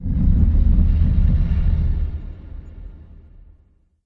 Deep growling or a low roar.
Created with a good old poster tube and Audacity.